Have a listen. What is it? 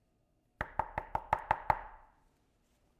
knocking GOOD 5.1 A
Mono recording of knocking on hard surfaces. No processing; this sound was designed as source material for another project.
door, MTC500-M002-s14, wood, knocking, knuckles